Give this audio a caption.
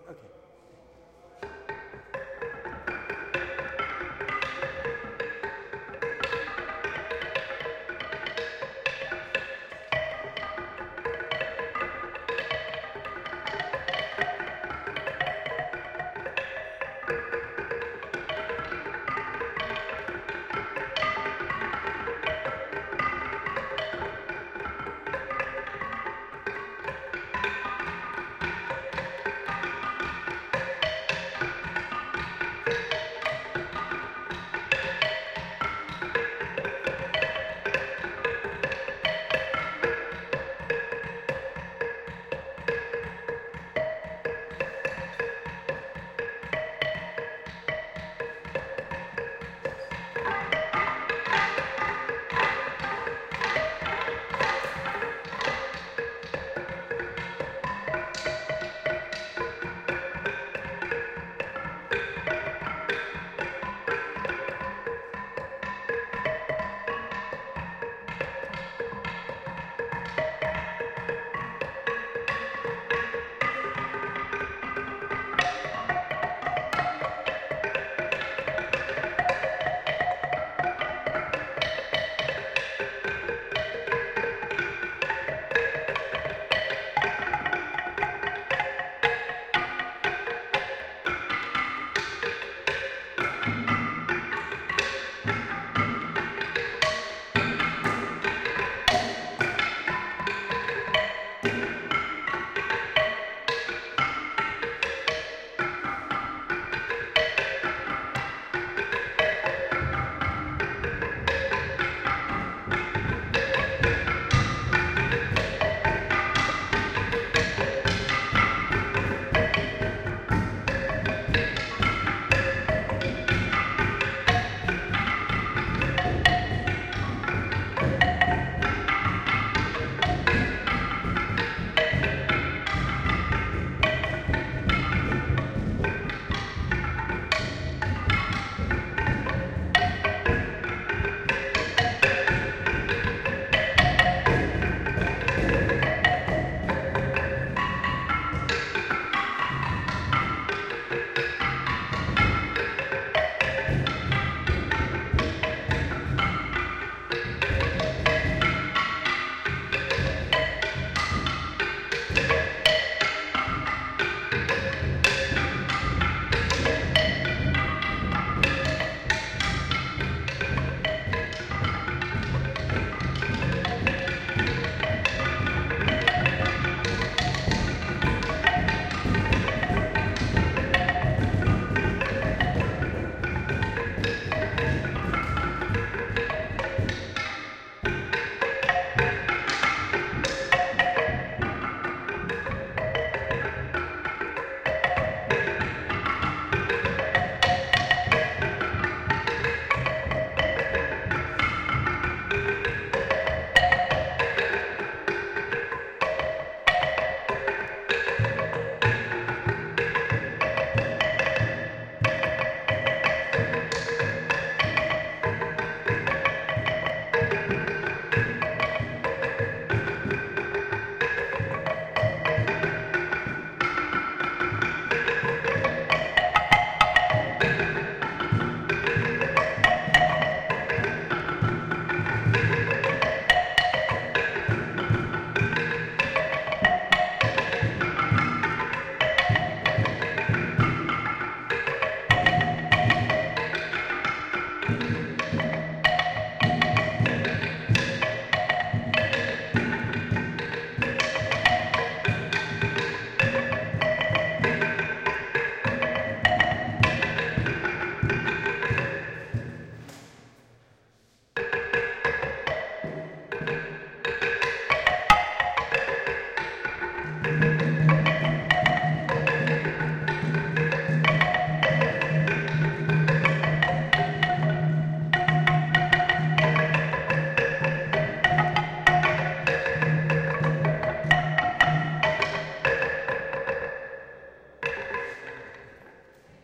Traditional xylophone in the Kampala museum, Uganda
Playing the xylophone in the Uganda Museum in Kampala. Enjoy the reverb.